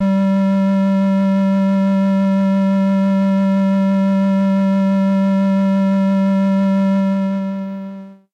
Fantasy G Low Long
Part of my sampled Casio VL-Tone VL-1 collectionfantasy preset in low G long hold. Classic electronica of the Human League 'Dare' era
casio, human, synth, vintage, vl-1